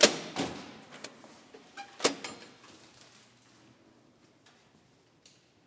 Subway station, emergency exit service door pushbar open and close
Ticket machine in NYC subway, emergency (service) exit inside the station
close, door, emergency, exit, MTA, New, New-York, New-York-City, NYC, open, push-bar, pushbar, service, subway, underground, York